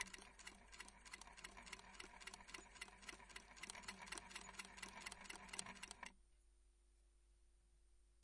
Queneau machine à coudre 35
son de machine à coudre